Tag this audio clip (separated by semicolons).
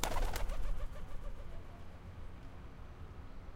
athmosphere; away; birds; city; field-recording; flying; pigeons; porto; smc2009